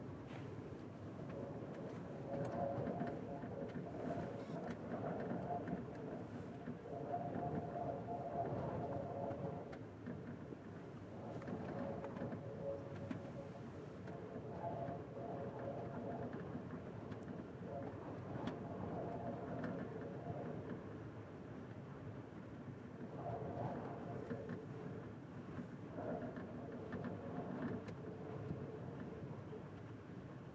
FX - rare 3